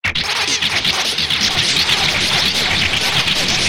Space Loop 01
Ambient noise loops, sequenced with multiple loops and other sounds processed individually, then mixed down and sent to another round of processing. Try them with time stretching and pitch shifting.
abstract, ambient, loop, noise, processed